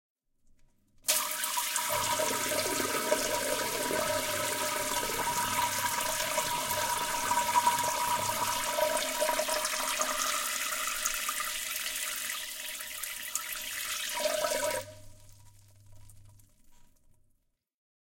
20190102 Spraying Water into Toilet 2
bathroom, spray, toilet, water